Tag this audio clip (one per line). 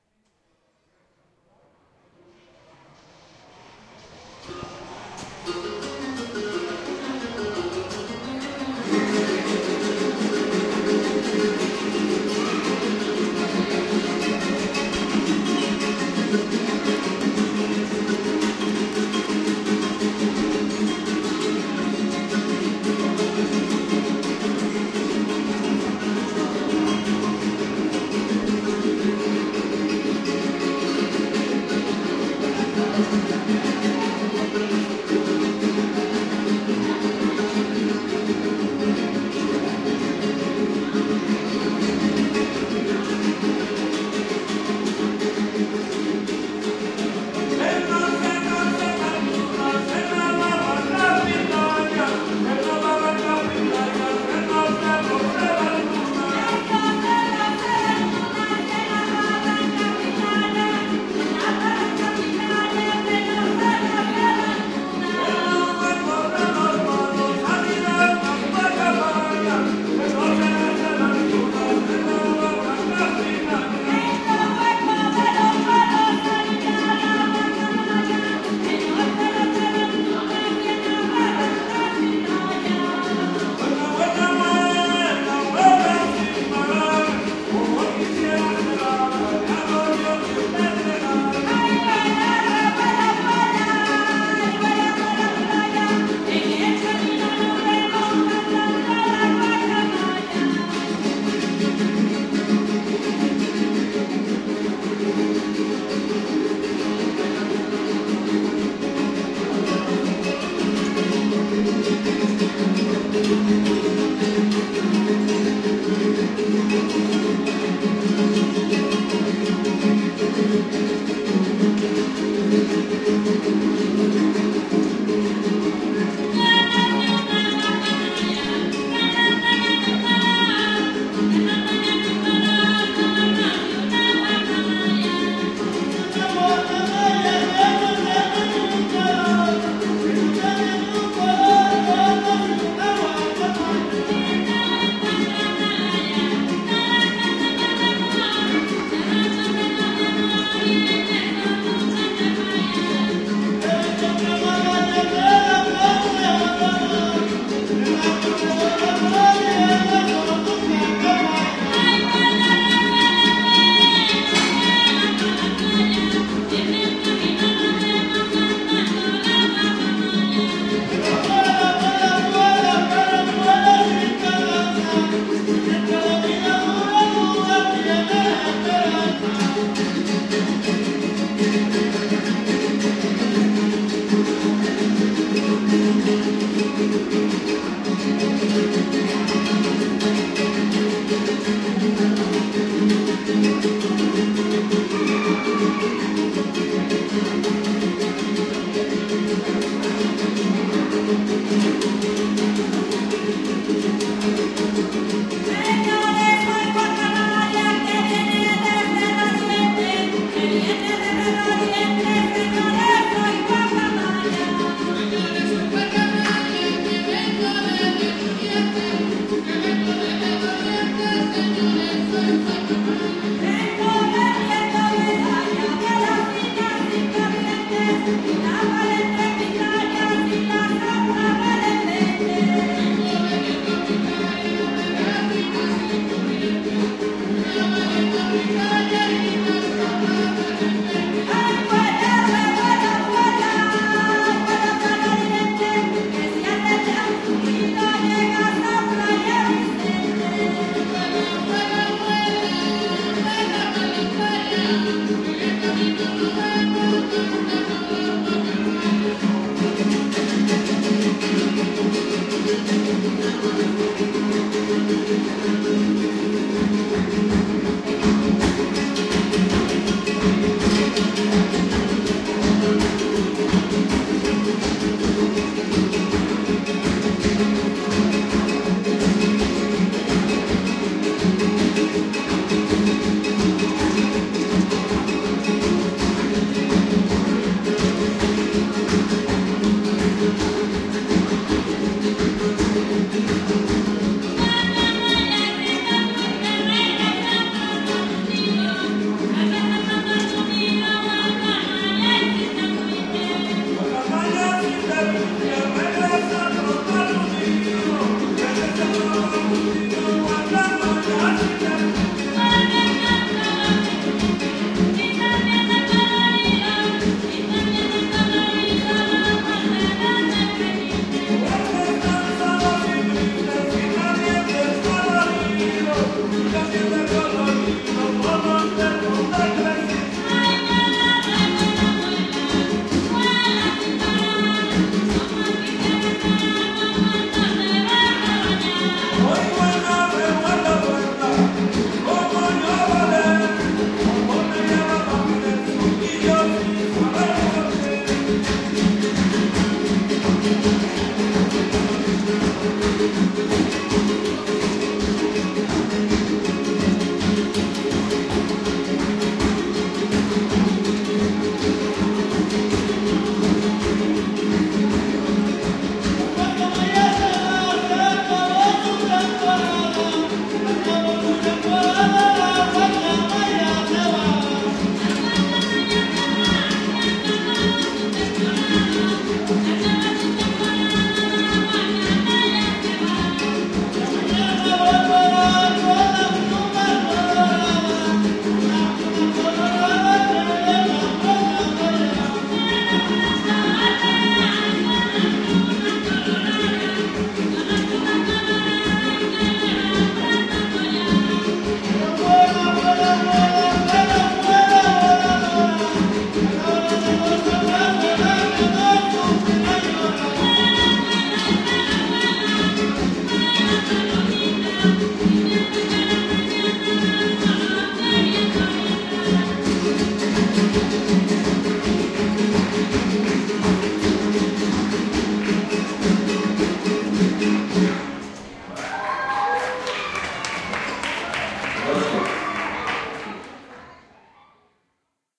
California
Field
Folklore
Guacamaya
guitars
Jarana
Jarocho
Mexico
n
Oakland
Playa
recording
Requinto
Son
Soneros
Strings
Tesechoac
Veracruz
Vicente
Voices